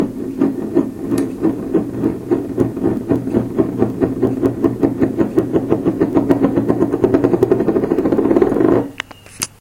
tea mug spinning